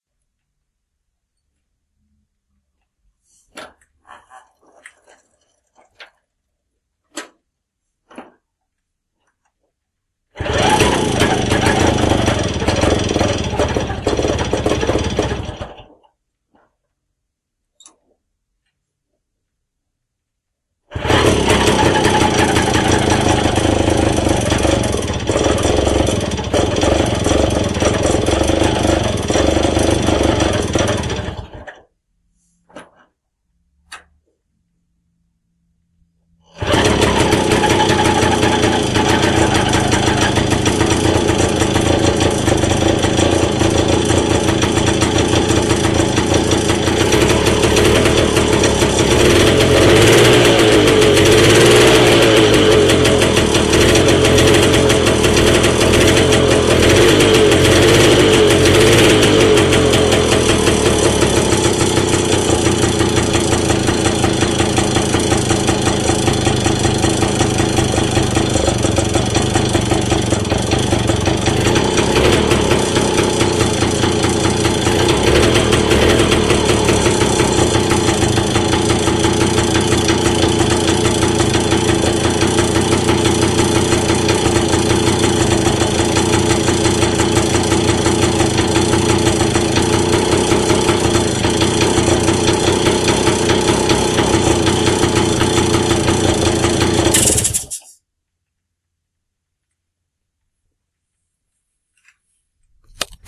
Engine run / Motor-Lauf
This sound is recorded directly from one of my original 1938 98cc light motorbikes. This time is a Express (manufaturer) SL 102 (type).
The noise you hear is all specific. It is the fuel cock on selection, the flooding of the carburettor, suction of the engine, two tries to bring the engine to live with clutch action inbetween. Running on idle and two short openings of the throttle.
Engine is shut off by using the decompression lever which is typical for this period of time and two stroke engines.
98cc-light-motorbike-engine-start, Engine-run, engine-startup, real-item-recorded, Sachs-engine